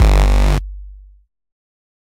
GNP Bass Drum - Robot Violation
Gabber-like kick drum with an almost siren-like sound.
kick, gnp, single-hit, hardcore, drum, gabber, powernoise